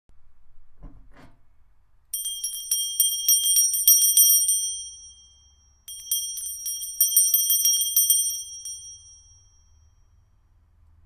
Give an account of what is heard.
Bell, ringing, ring